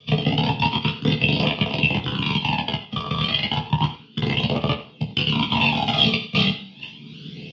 FTZ GC 111 RottemWarp1
Sounds of bigger and smaller spaceships and other sounds very common in airless Space.
How I made them:
Rubbing different things on different surfaces in front of 2 x AKG S1000, then processing them with the free Kjearhus plugins and some guitaramp simulators.
Aliens, Game-Creation, Hyperdrive, Outer, Phaser, Space, Spaceship, Warp